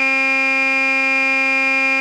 C1 sample recorded with Korg's Monotron synth for a unique sampled synth.
Recorded through a Yamaha MG124cx to an Mbox.
Ableton Live